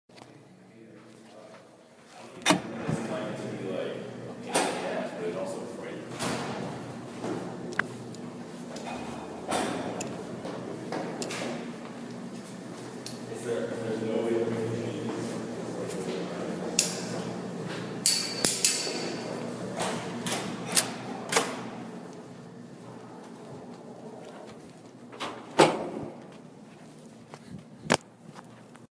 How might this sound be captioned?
Stairwell Sounds
Opening a door to a deep stairwell and the low tones that echo throughout the vacuum of space. There are also footsteps going down the stairs.
door, echo, footsteps, low, opening, stairs, stairwell, tones